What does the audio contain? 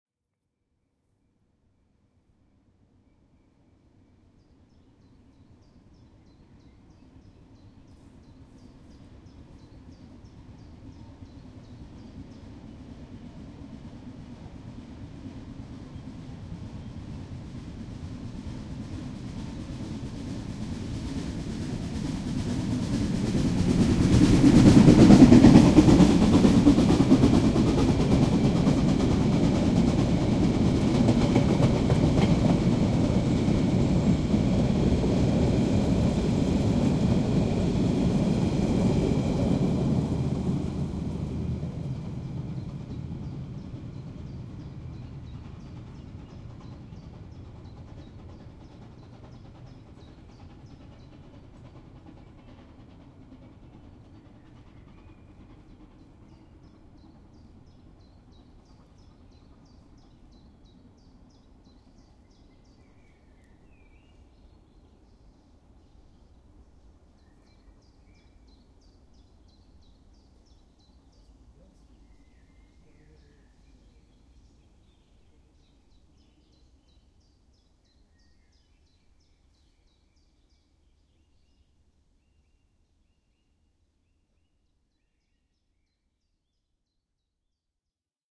Steam-Train Molli passes through without whistle
This is passing through recording of steamtrain Molli (Germany)...
recorded on zoom H2 with buildin microphones
cut ´n fades Audacity
Steam, Train, Molli